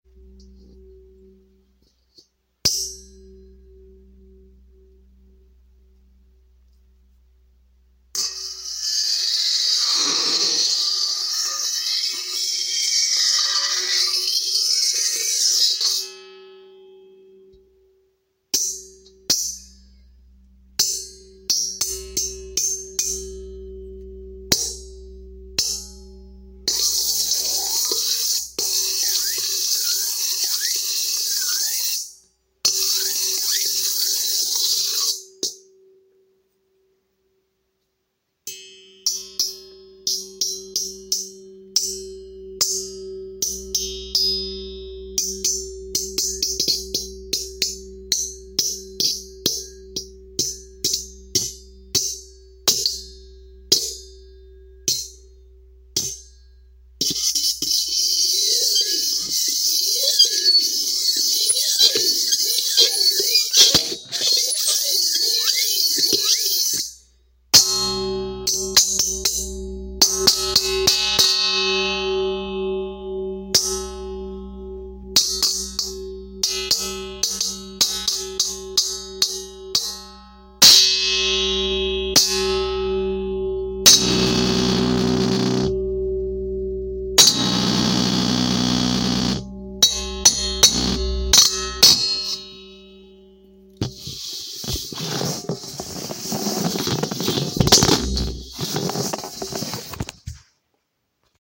using a fork to scrape a big metal bowl with my phone in it
field-recording, sample, hit, experimental, metallic, weird, sound-effect, percussion, bowl, metal, fx, scraping, concrete, found-sound, scrape